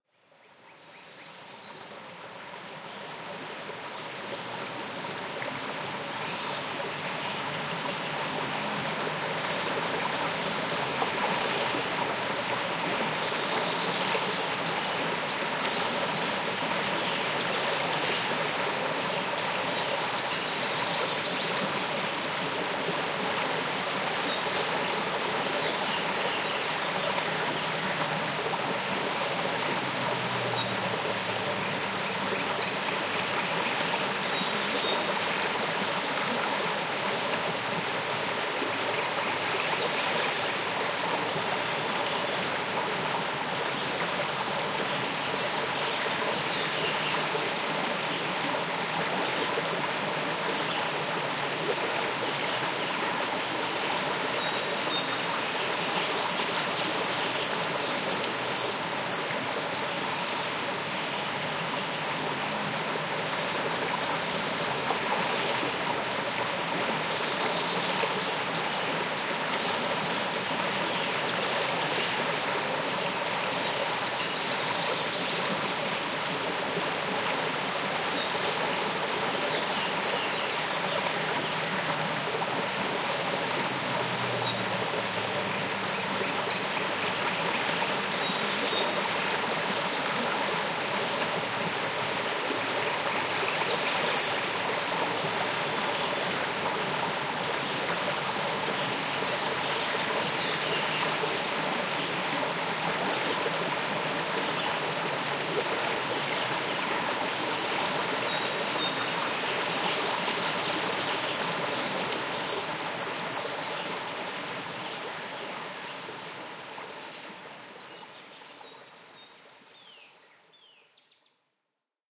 heavy stream with birds
stream, forest, park, birds, water, nature